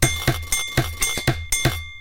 A drum loop I created for a reactable concert in Brussels using kitchen sounds. Recorded with a cheap microphone.
They are dry and unprocessed, to make them sound good you
need a reactable :), or some additional processing.
rhythm, kitchen, dry